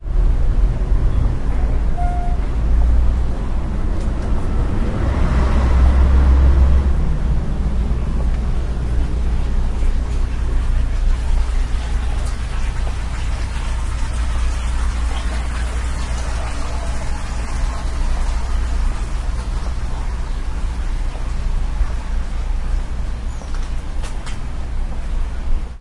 0104 Traffic and water
Traffic and water
20120118
water, traffic, korea, field-recording